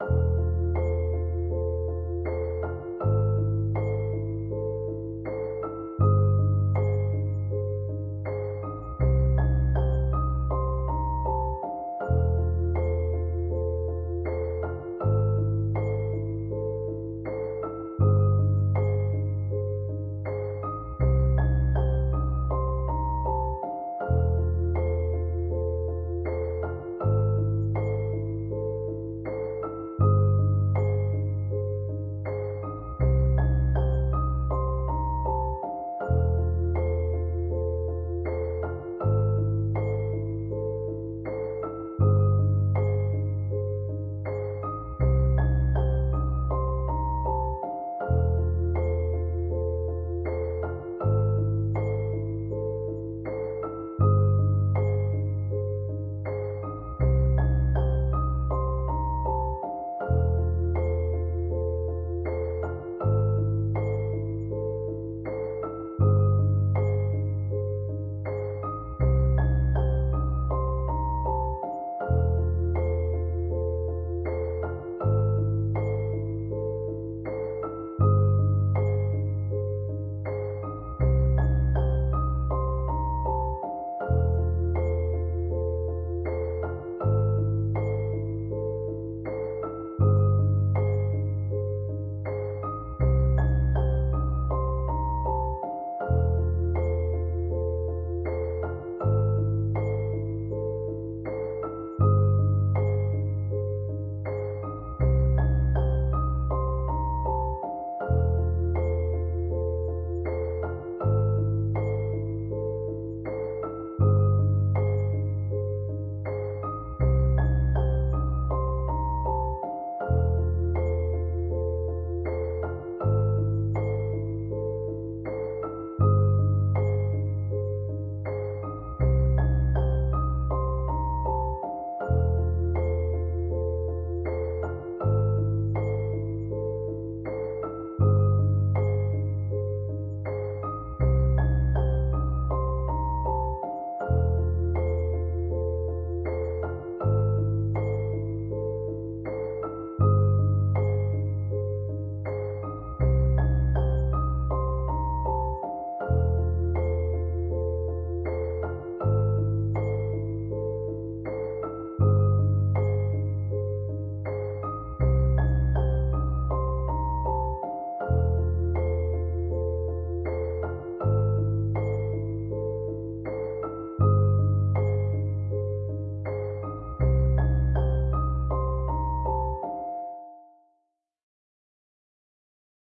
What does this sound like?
Dark loops 027 simple mix 80 bpm
80, 80bpm, bass, bpm, dark, loop, loops, piano